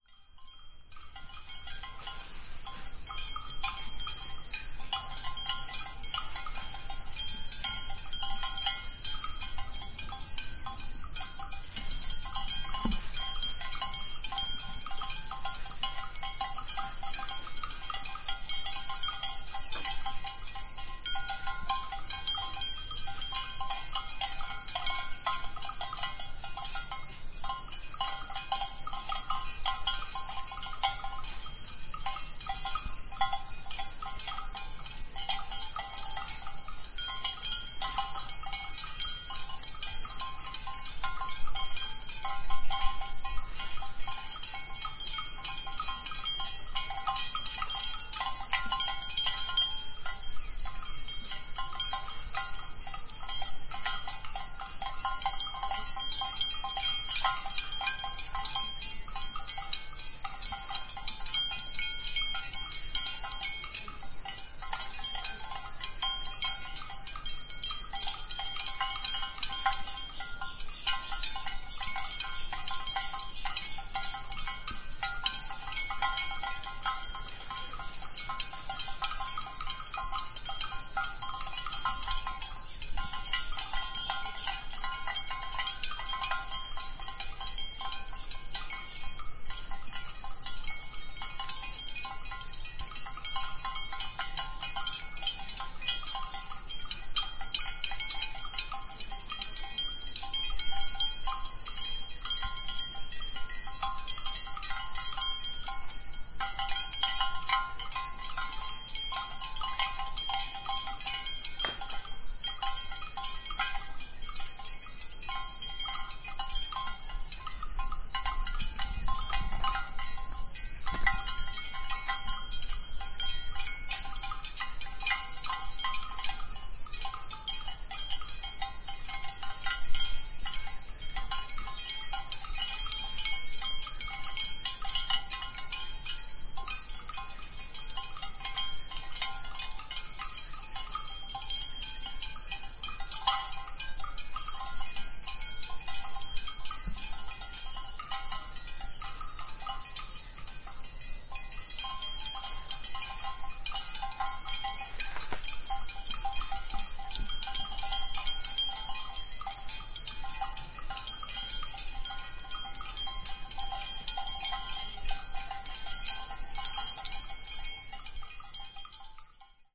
Field recording of Water Buffalo bells sounding as the creatures wallow and graze near the village of Ban Paklung, Laos.
Sony PCM-D50